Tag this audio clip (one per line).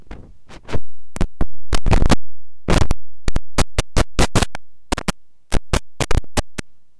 cracle
electric
microphone
noise
zap